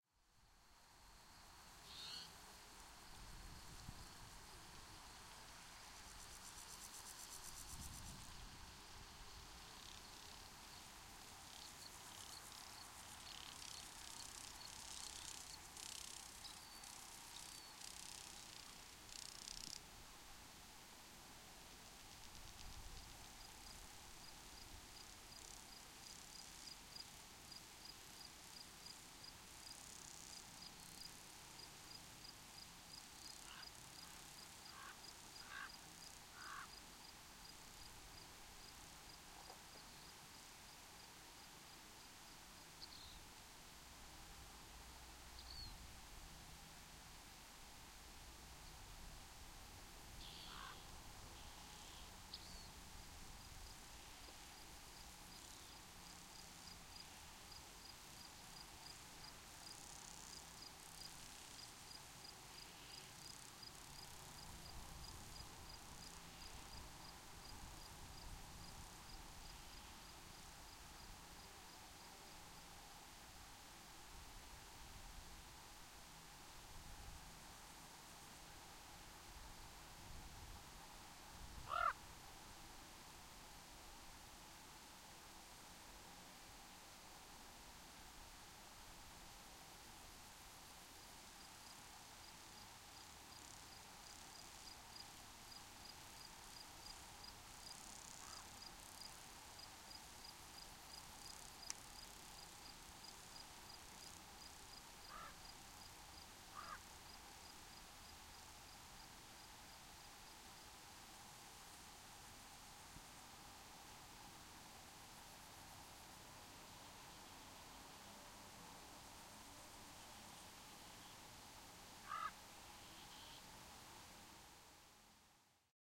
Semi desert insects ravens birds quiet with bad mic noise BADLANDS AB 190818
Very quiet ambience, hot, desert, wind, insects. Stereo spaced EM172s.
ambience, wind, insects, desert